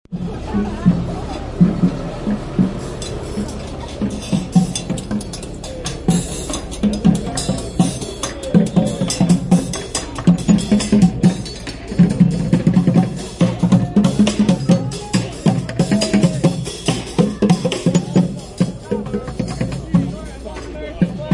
A brief recording of a trash-can percussion band at Joe Louis Arena in Detroit as I walked by. Could be good for looping?